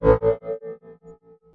ufo-shield
Sounds used in the game "Unknown Invaders".
alien, ufo, game, space, ship, galaxy, gun